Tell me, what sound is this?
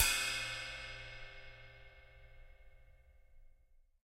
Rod Mini China 01
Cymbal recorded with Rode NT 5 Mics in the Studio. Editing with REAPER.